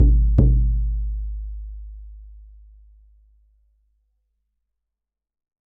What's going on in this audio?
NATIVE DRUM DOUBLE STRIKE 03
This sample pack contains 9 short samples of a native north American hand drum of the kind used in a pow-wow gathering. There are four double strikes and five quadruple strikes. Source was captured with a Josephson C617 through NPNG preamp and Frontier Design Group converters into Pro Tools. Final edit in Cool Edit Pro.
drum, indian